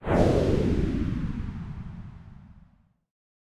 jobromedia-bullet-slowdown
This is an effect when you go into slow motion mode in games like Max Payne, and movies like the Matrix.
matrix, slow-motion